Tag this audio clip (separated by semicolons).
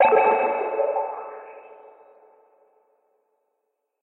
effects; FX; Gameaudio; indiegame; SFX; sound-desing; Sounds